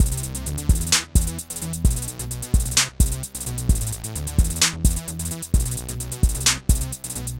Tech Bass
Trap inspired drum loop using a techno synth. It sounds pretty calm.
130-bpm, beat, drum-loop, drums, electric, electro, groovy, loop, techno, trap